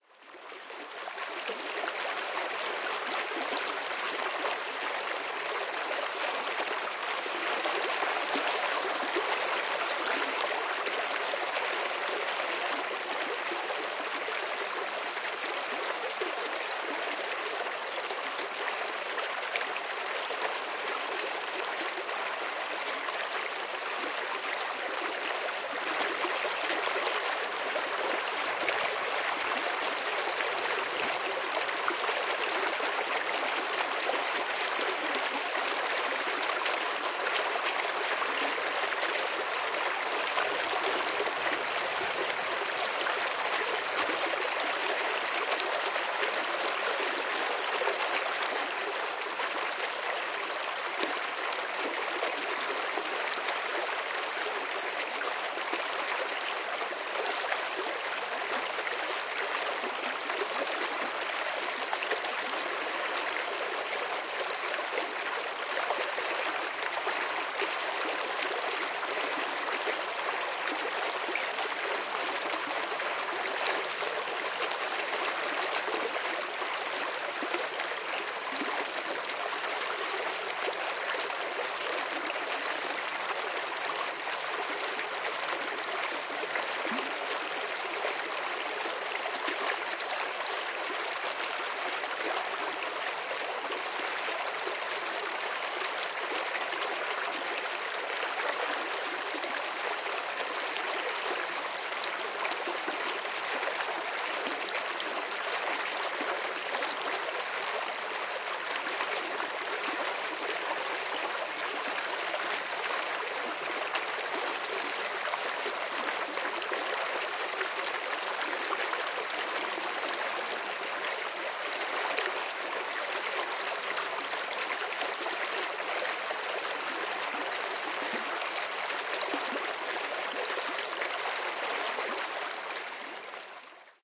Flowing Water at Buttsbury Wash
Water flowing across the road at Buttsbury Wash in Essex, UK. This was recorded on the morning of 19/01/2019.
Recorded with Sennheiser ME66/K6 attached to a Zoom H5. No editing, apart from the fades at the beginning and end, which were carried out with Audacity.